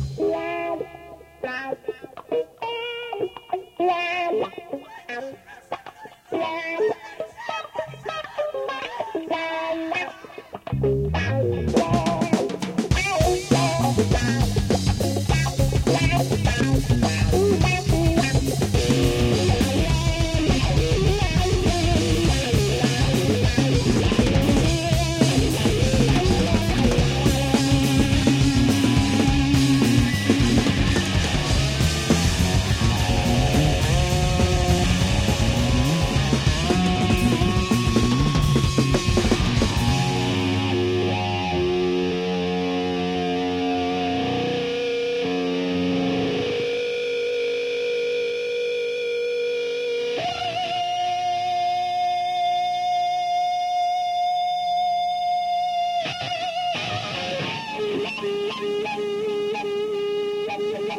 Wah Guitar Jam Part3
Live recording with guitarist Ajax
Jam, Wah, Guitar